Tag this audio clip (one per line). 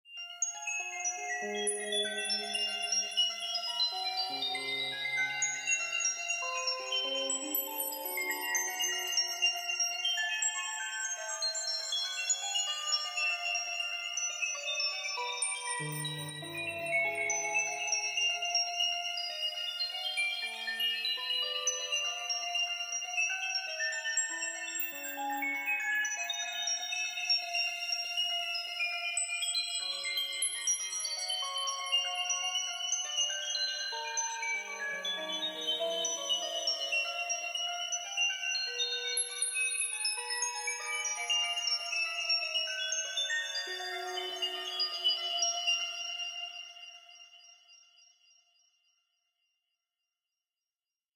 ambience
scary
ambient
horror